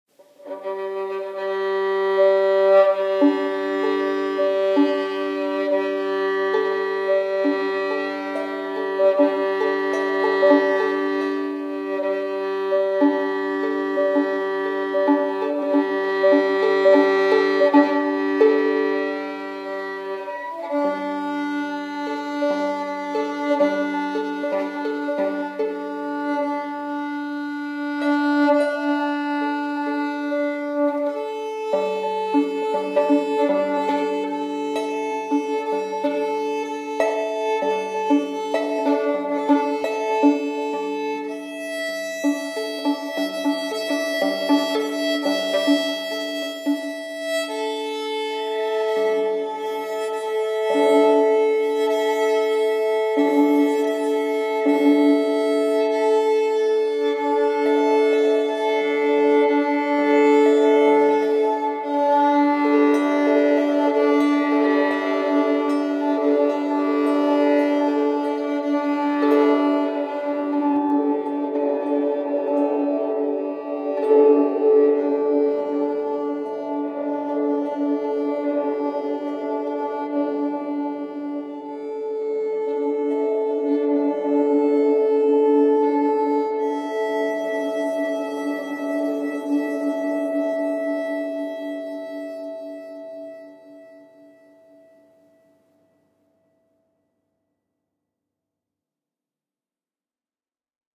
Violin Open Strings
This is just short demonstration music of me playing (mostly), Not Multiple Notes in each of the strings, "(ONLY the open strings)" - Arco and Pizzicato, (All at Once). You will also hear some strange reverb through-out with delay echoes at the end.
Delay, Echo, Open-Strings, Phrase, Reverb, Riff, Sound-Effects, Strange, String-Instrument, Violin, Violin-riff